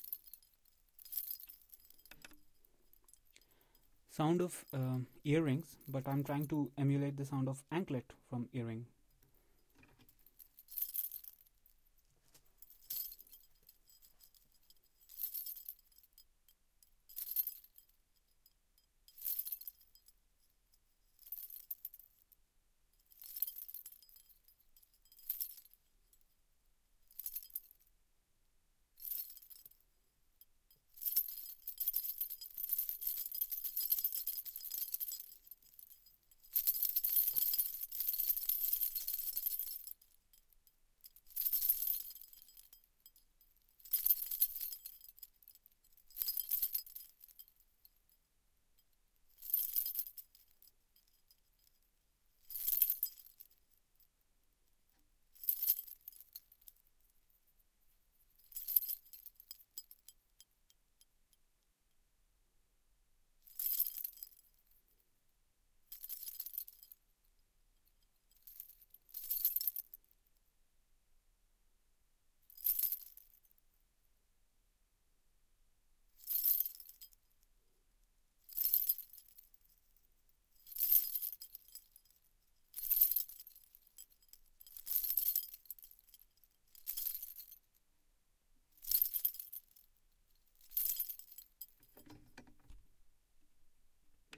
Earring Anklet Payal Jhumka Jewellery
Some jewellery sounds